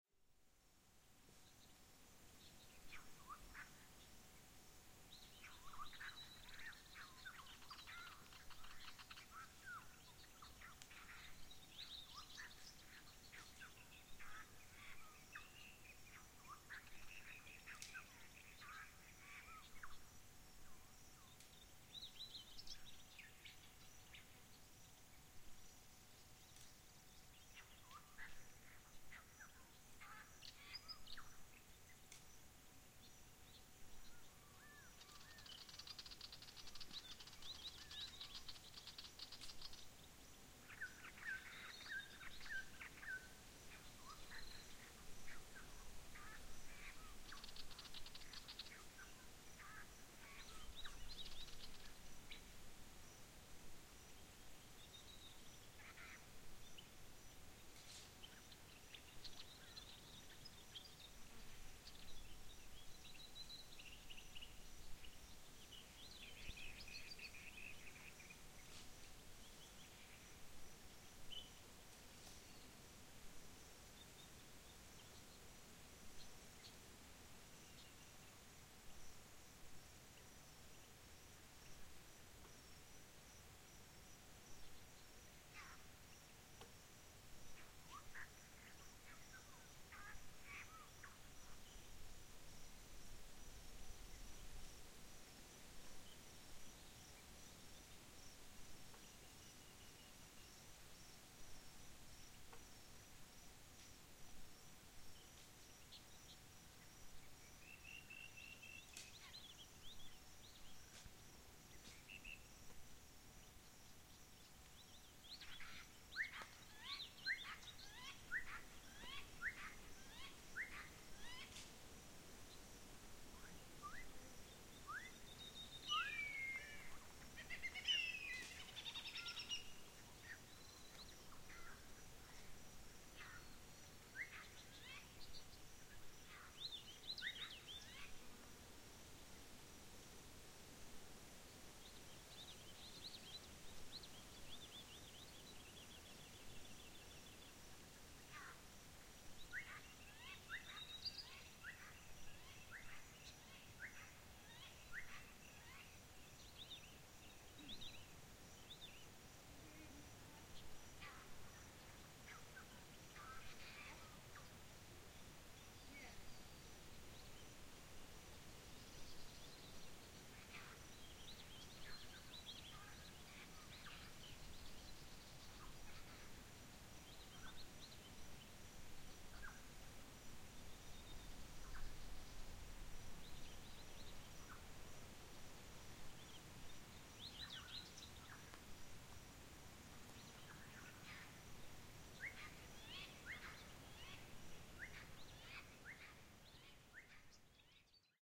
Recorded at a billabong just west of Lajamanu in the Northern Territory. Listen out for the Whistling Kite at 02:05.